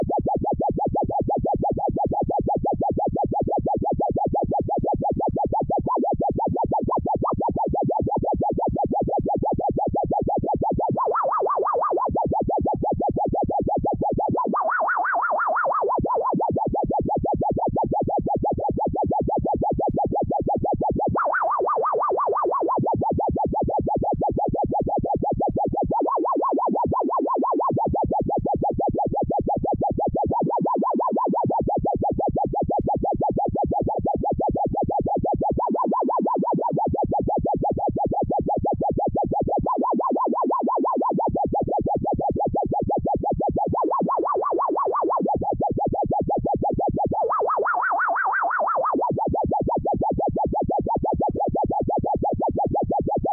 Violin thru FX pedal
Violin through filter fx on Line 6 pedal.
synth, noise, violin, ambient, filter